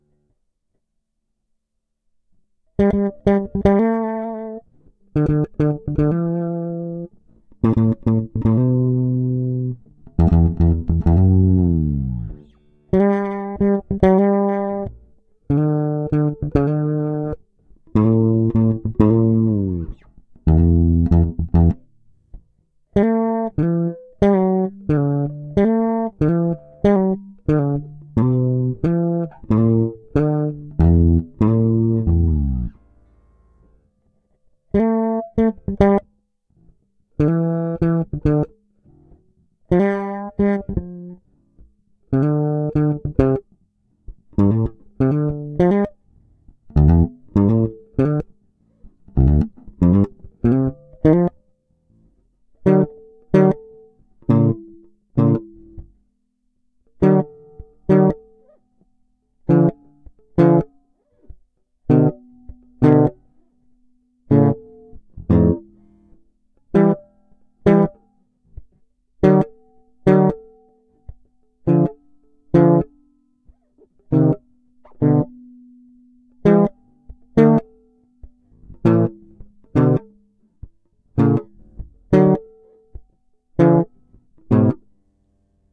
Fretless jBass 8ttva squacks jam
recorded using Native Instruments AK-1 interface and Presonus Studio One v5 DAW.
playing some licks around twelfth frets
bass, fretless, jBass, licks